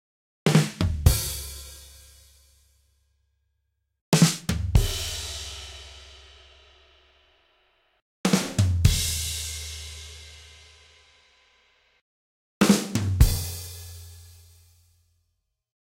Ba Dum Bum ALL
This is the classic comedy "Ba Dum Bum" drum roll that hits or stings after telling a joke. There are four variations, four different drum kits. Recorded in Logic Pro X with (4) different drum kits. I played each of these via midi keyboard. Enjoy!
funny, comedy, joke, sting, drum, bum, ba, telling, versions